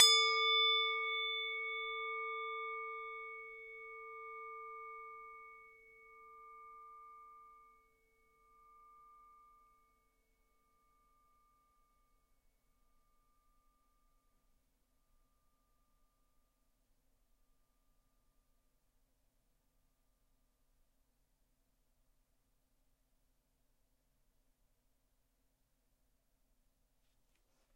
Singing bowl struck